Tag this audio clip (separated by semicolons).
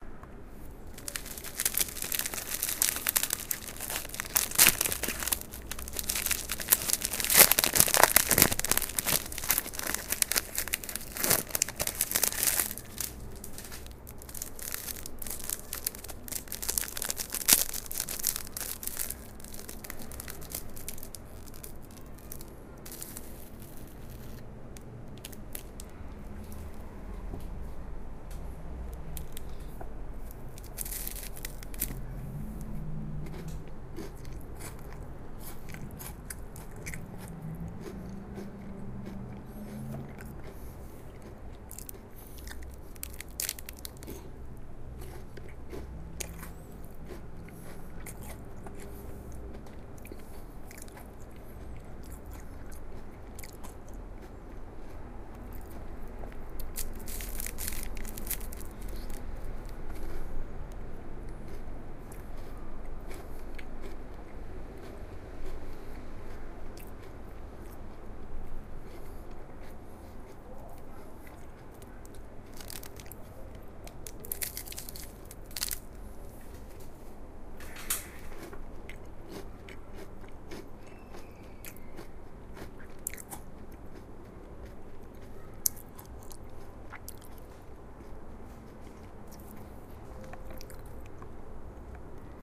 Crunch; Eating; Opening; Plastic-Wrap